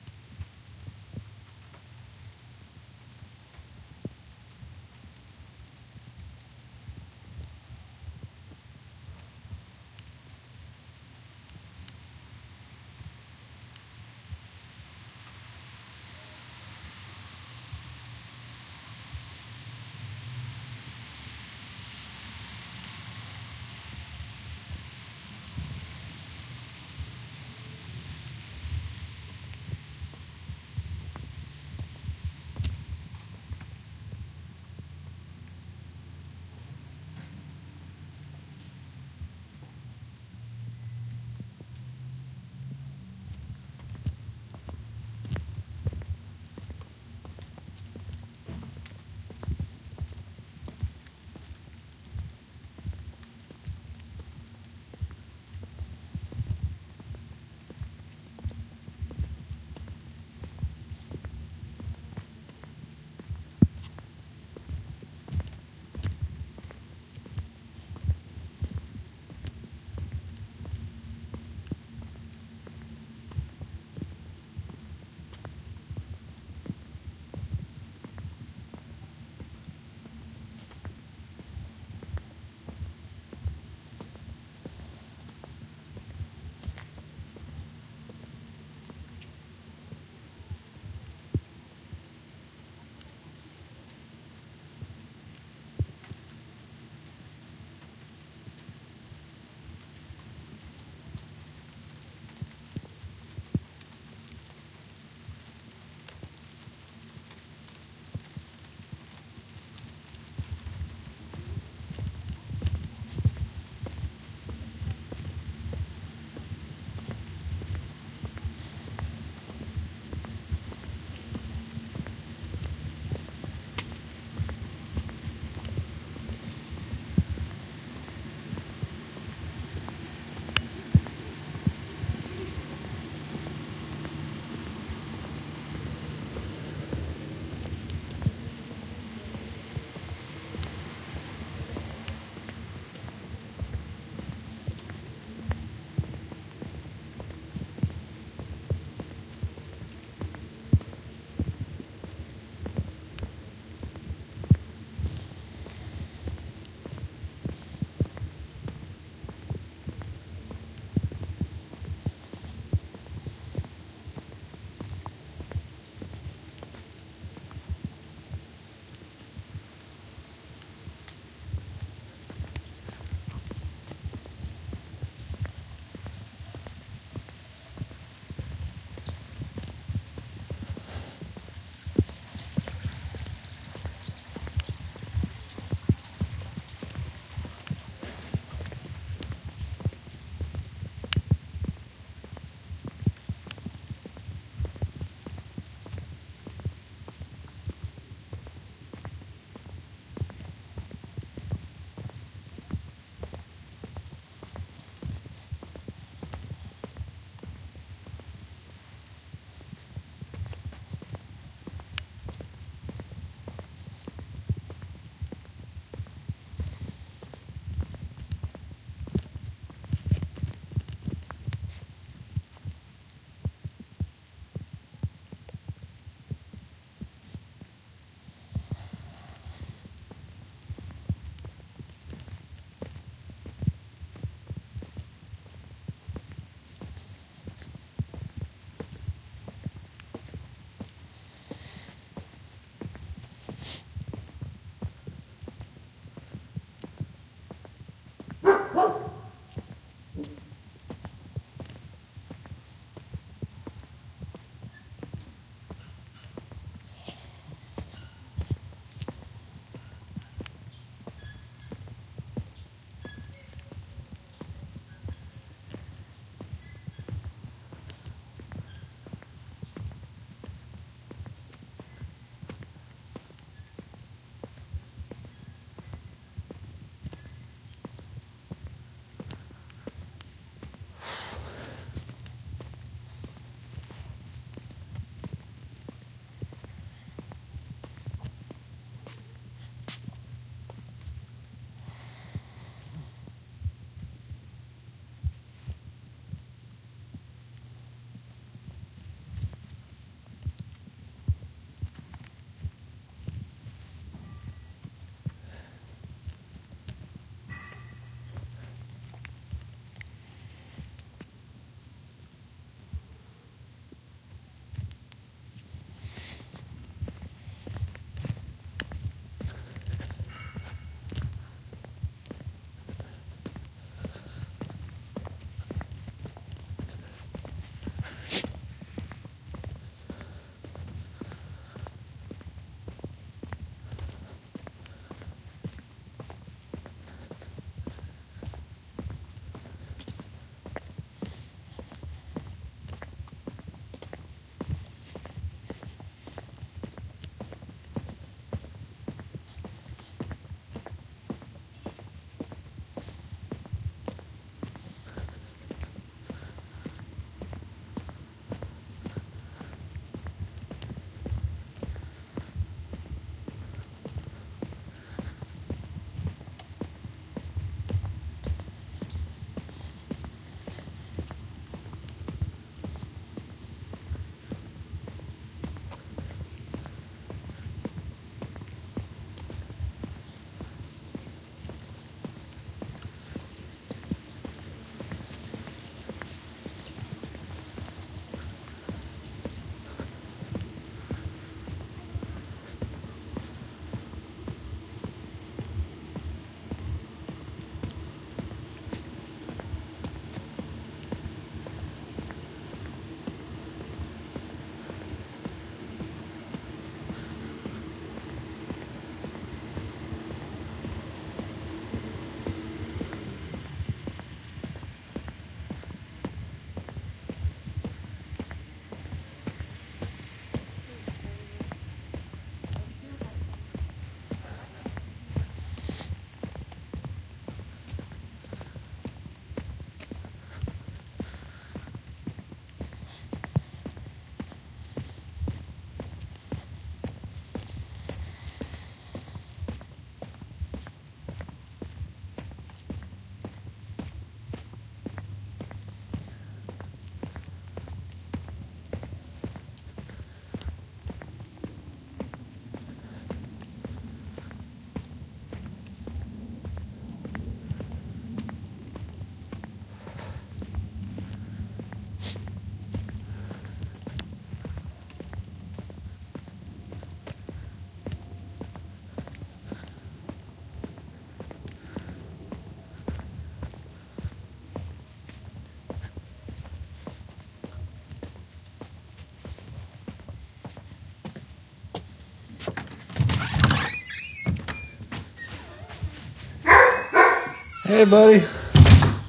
Took a walk through some rain in my neighborhood. Processed in FL Studio to remove unnecessary frequencies and be louder.